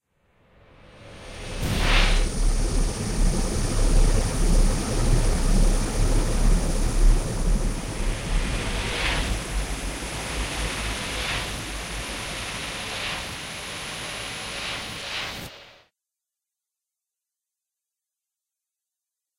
The Shrinkening Ray
A shrink-ray of some description.
Made by recording my actual real shrink ray which I used on an elephant so I could keep it as a pet in an empty fishtank.
sci-fi, shrink, science-fiction, shrink-ray, scifi, ray